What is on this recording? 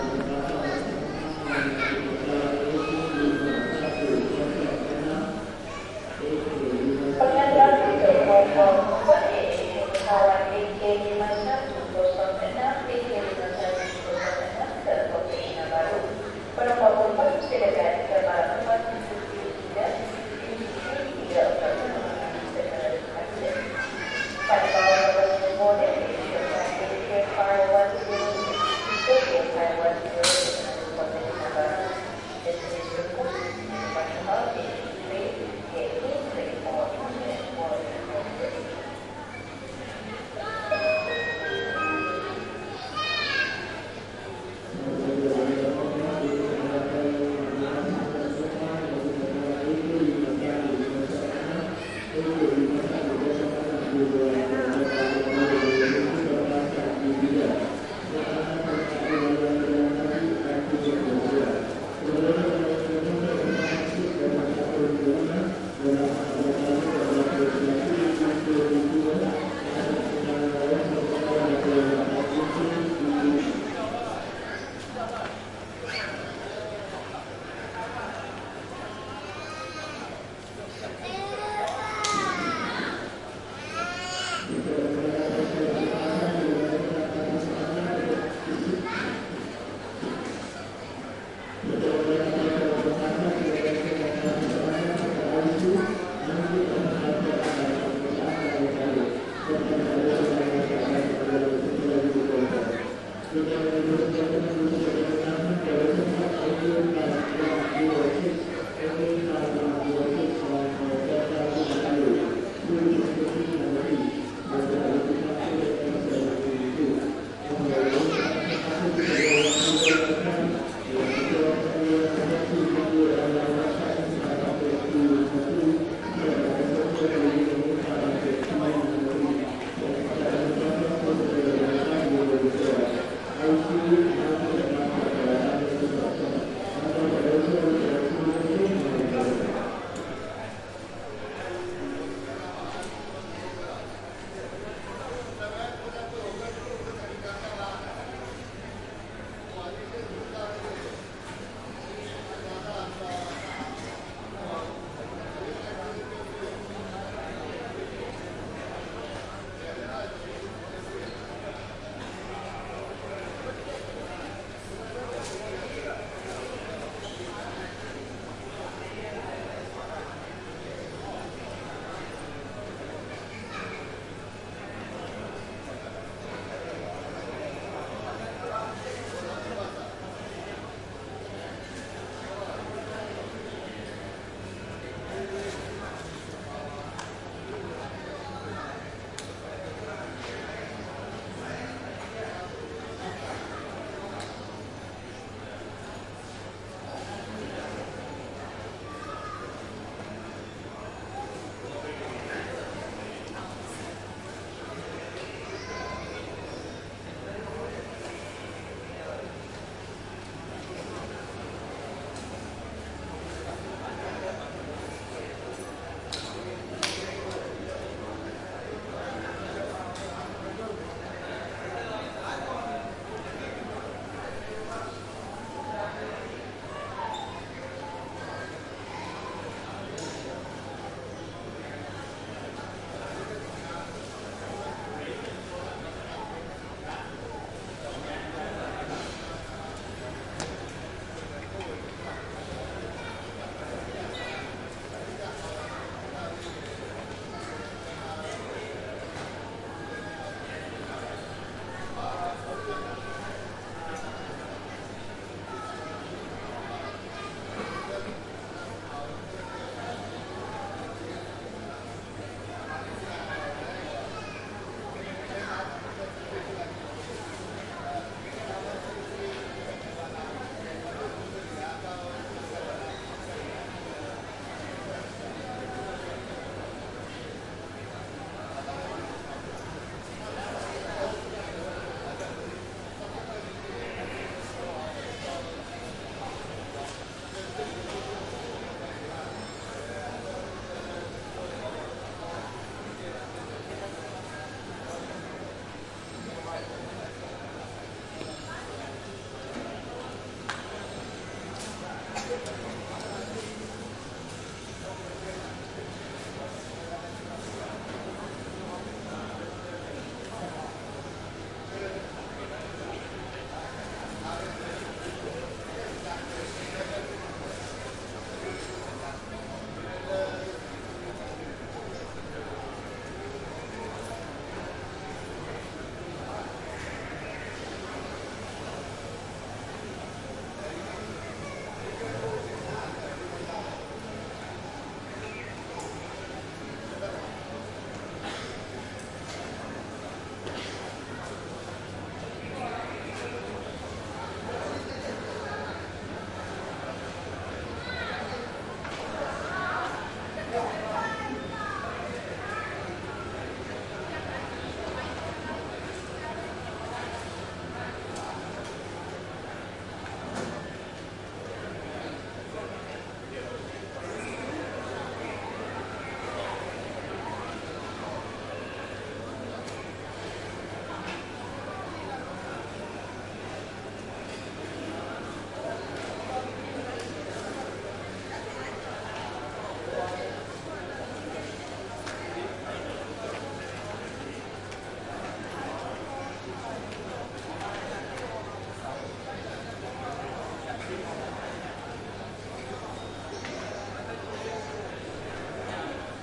Kuala Lumpur Airport ambience, Terminal P6, 22.09.13 Roland R-26 XY mics
Made with Roland R-26 XY mics, airport crowd & ambience at Kuala Lumpur Airport LCCT, Terminal P6
Kuala-Lumpur, Malaysia, airport, ambience, ambient, atmos, atmosphere, background-sound, field-recording, general-noise, people, soundscape